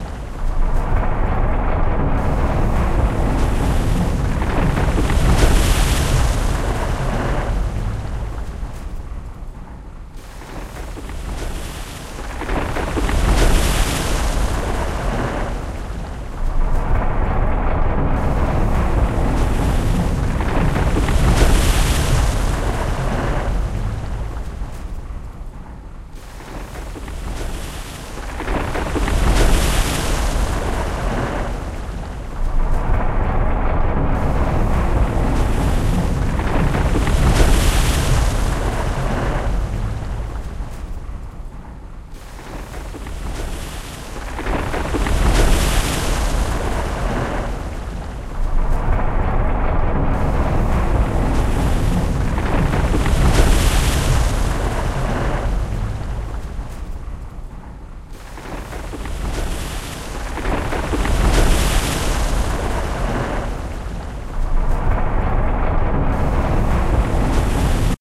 A wave sound fabricated from other sounds:
metal sliding doors, breaking glass and ice falling into water.